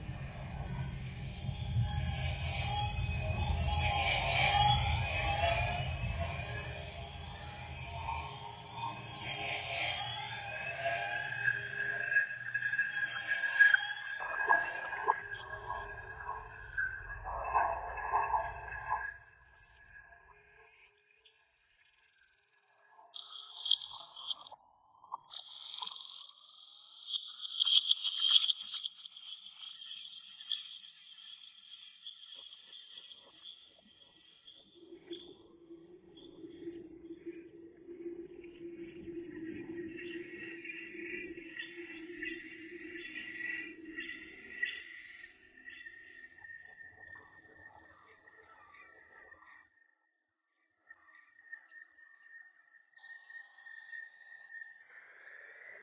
several field recordings blended together one evening using reaktor and the SIRII convolution vst plugin in ableton live.
alien, weird, gray, tunnel, atmospheric, smooth, ambient, convolution